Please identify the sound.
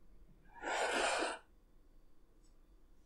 glass slide 02
sliding a glass across a table
table, glass, slide